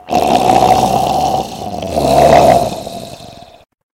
Zombie Growl 2
Zombie Growl (sounds like a "this is my dinner, go find your own growl)
horror, halloween, growl, dead, zombie, undead, scary, thriller